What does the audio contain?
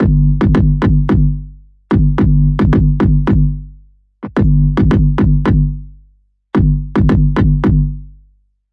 Daddy D Destorted Drum Loop
A heavily distorted kick drum line processed in Izotope trash 1 from an old track of mine.
Bass, Distorted, Distortion, Drum, Drum-Loop, Drums, Hard, Heavy, Izotope, Kick, Kick-Drum, Kick-Loop, Loop, Meaty, Overdrive, Rough, Spread, Stereo, Stereo-Spread, Thick, Trash, Wide